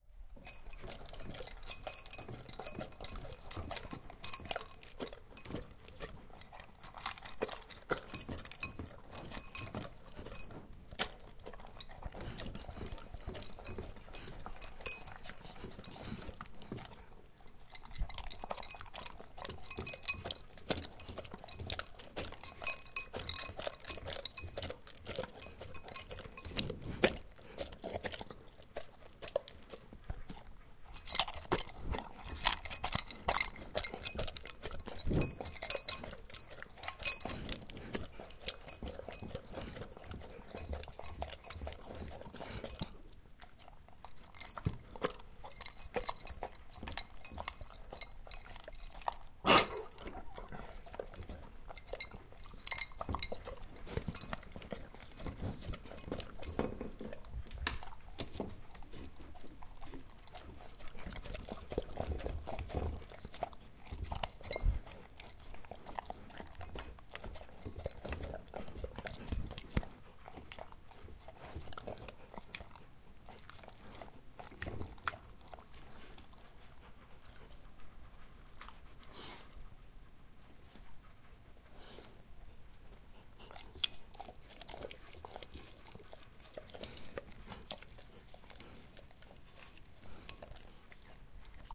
Igor12b-eating dinner
A recording of my Alaskan Malamute, Igor, while he is waiting for his dinner. Malamutes are known for their evocative vocal ability. Recorded with a Zoom H2 in my kitchen.